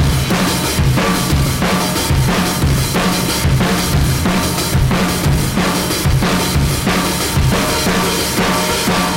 let-it-go beats2
Drum recording from live session with Fur Blend - 2 Mic recording onto 3M M79 2" tape at Greenmount Studios
drum-loop, drum-and-bass, drums